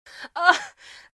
Woman in Pain
upset, vocal, sadness, heartache, agony, female, cry, anguish, grief, lady, sorrow, woman, vocalization, cries, voice, pain, acting, moan, emotional, human